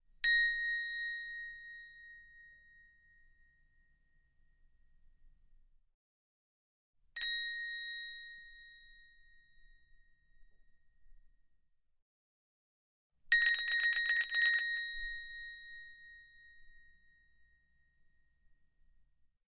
chromatic handbells 12 tones a#1
Chromatic handbells 12 tones. A# tone.
Normalized to -3dB.
bell, handbell, ring, tuned